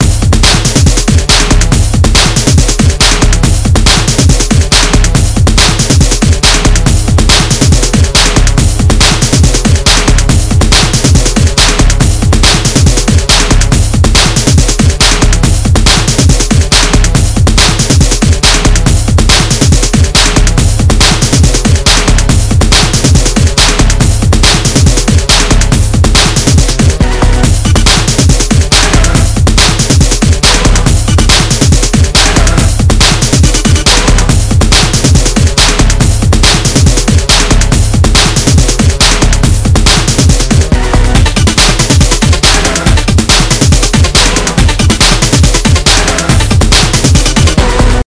Dance Loop
Part of a fun, frivolous tune I made a while back, I dug out an early version of the backing for my fellow free sounders creative usage.
Enjoy
loop, quirky